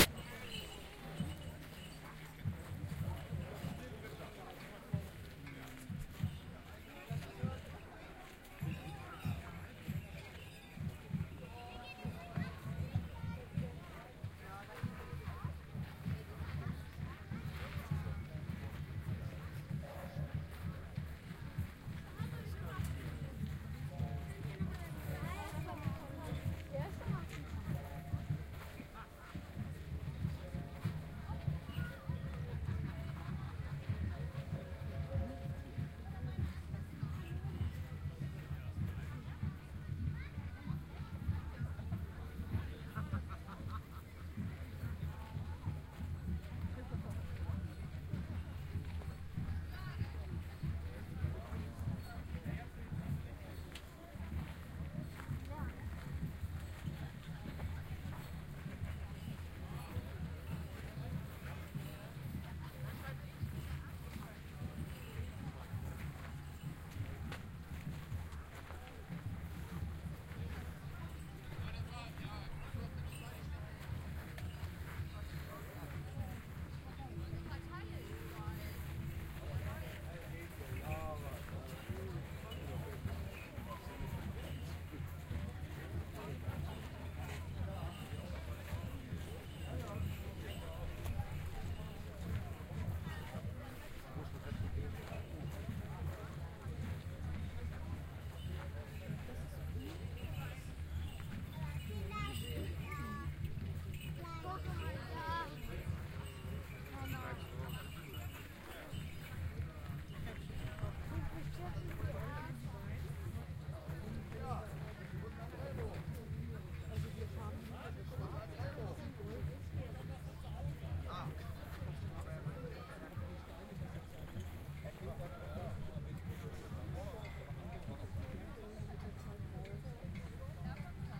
Sehusa Fest Medieval Crowd
The town of Seesen, at the western side of the Harzmountains in the north of Germany, turns every year on the first weekend in September into a party with a medieval flair.
This track was recorded on the 1st of September 2007 with a Sharp MD-DR 470H minidisk player and the Soundman OKM II binaural microphones. One can hear a bit of the "medieval" crowd.
P.s.: Sorry about the bit in the beginning. I´ll forgot to cut it.
medieval, sehusa, crowd, field-recording